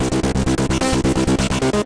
Hard synth C minor 130 BPM
Hard bass synth with stereo delay and distortion. Made with Waves FlowMotion.
electro, rave, synth-loop, techno, bass